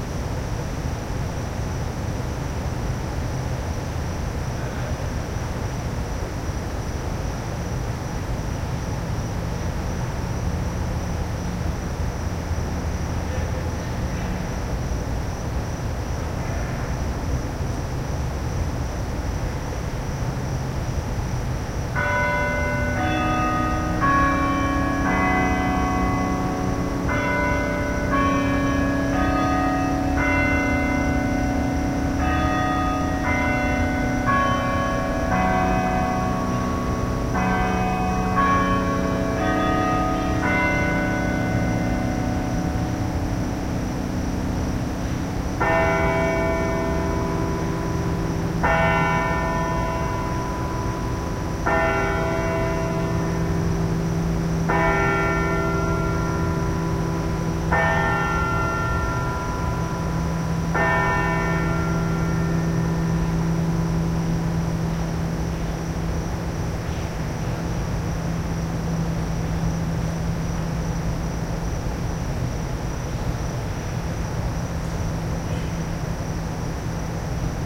9 8 08 - Student Building Chimes 6pm
The clock on the Student Building at IU strikes 6pm from a distance. And, of course, the A/C's sing backup. Recorded with my Sony MZ-N707 MD and Sony ECM-MS907 Mic.
clock, bells